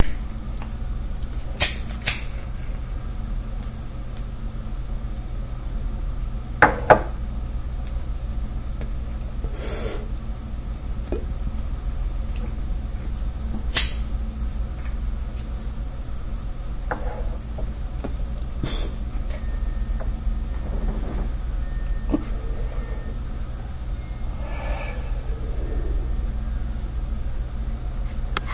flicklighter smoke inhale flick
Raw recordings of smoking made with DS-40.
pipe, lighter, smoking